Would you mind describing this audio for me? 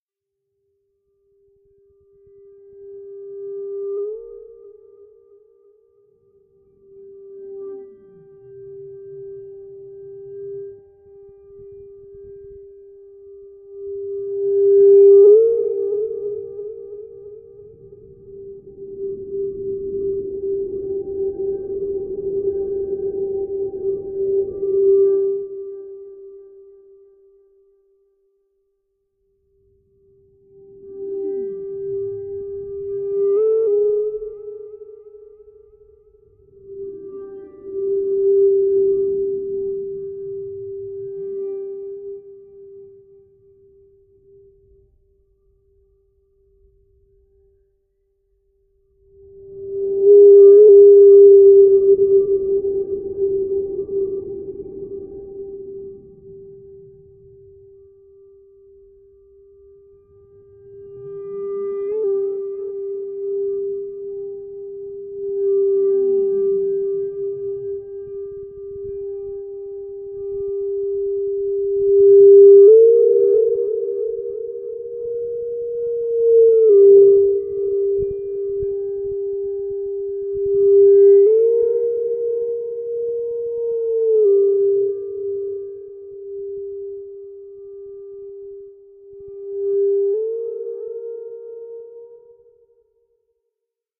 signal sound for a dark soundscape
tonal, synth, dark